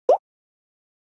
UI Pop - Variation 1.